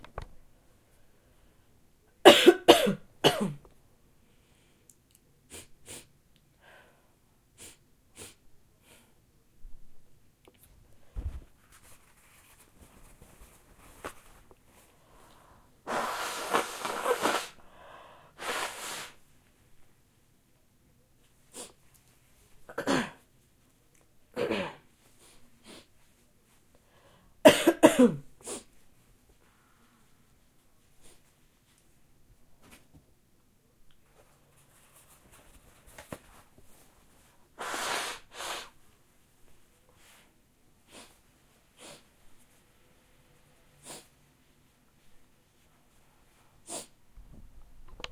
The sounds of a woman sneezing and coughing and blowing there nose
coughing, sneezing, sounds, vocals